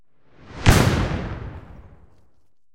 explosion low fuse 1
Explosion with short "fuse" before the bang.(lower pitch) Made of multiple firework-recordings.
burst, eruption